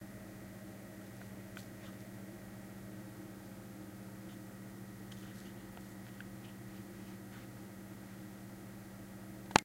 Busy Computer 1
Recorded with a black digital IC Sony voice recorder.
hum, machinery, mechanical, machine, office, working, computer